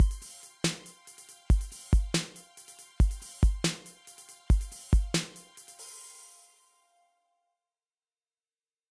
Odd-Time clip 140BPM
140, electronic-music, strings, BPM, odd-time-signature, snickerdoodle